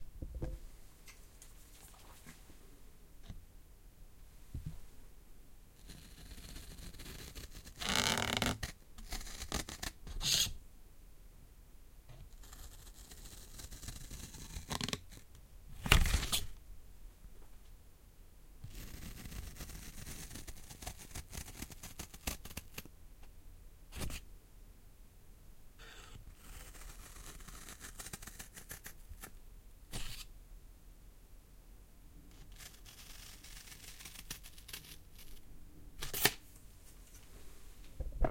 scissors cutting paper
cutting, paper, scissors